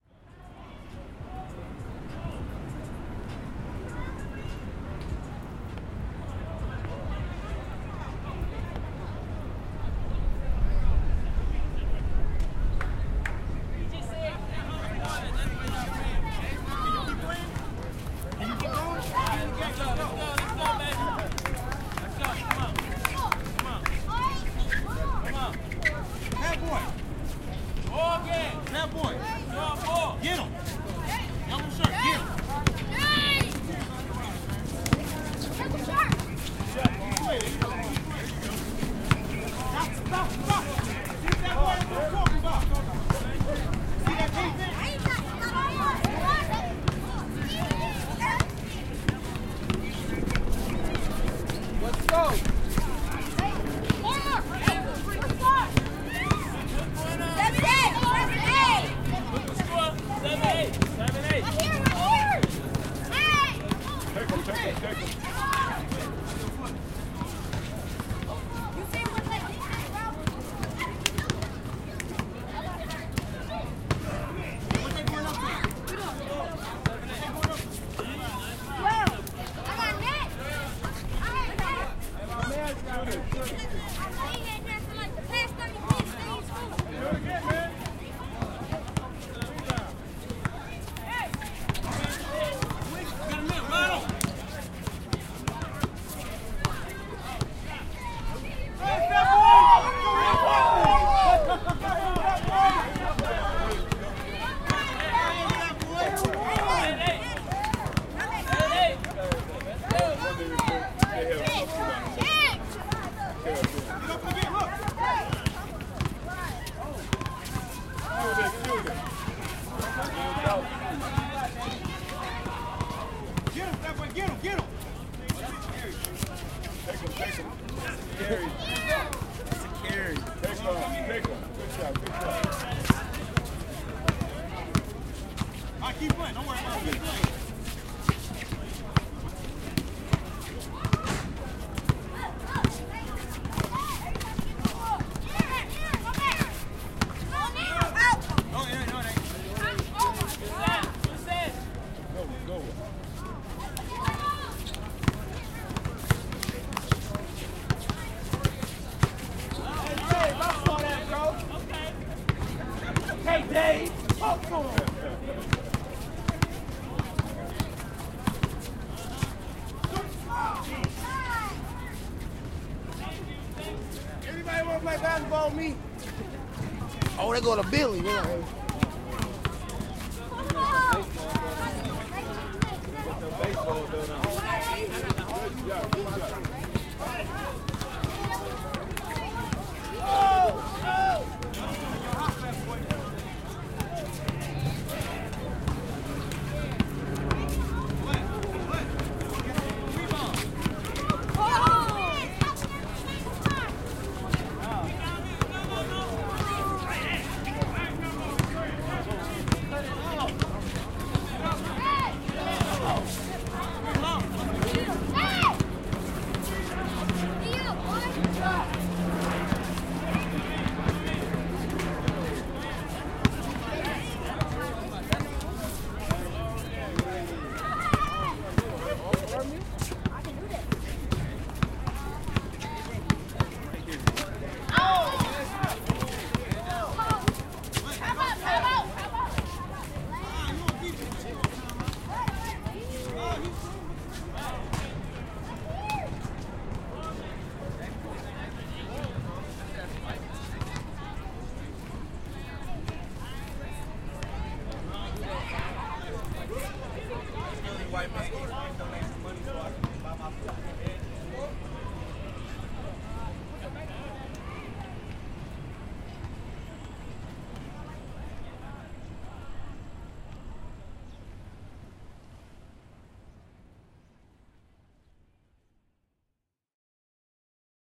Street basketball in detroit
Street basketball at the Campus Martius in downtown Detroit